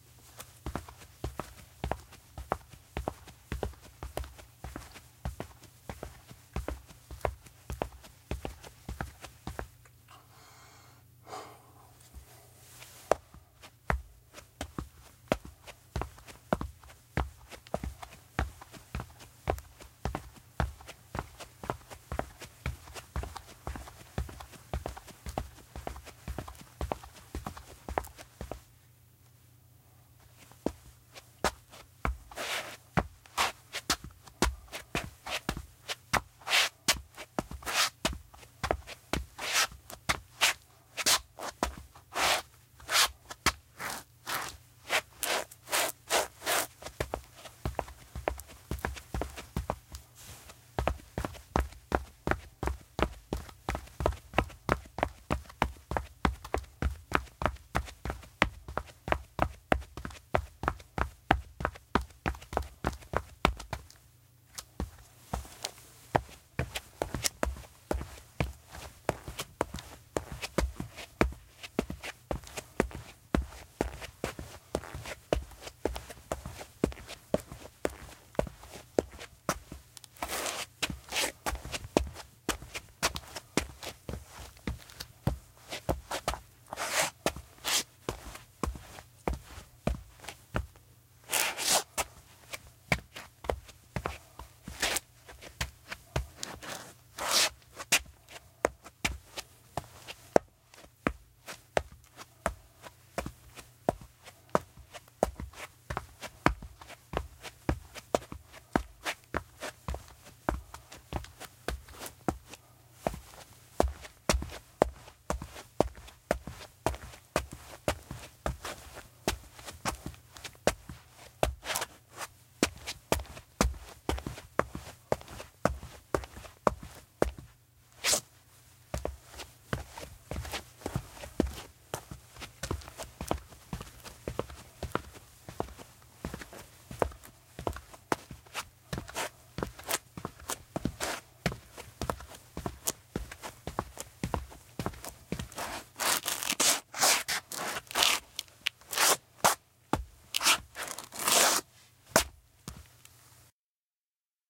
footstep concrete walking running scuff clean concrete dry hard-001
clean, concrete, dry, footstep, hard, running, scuff, step, stone, walking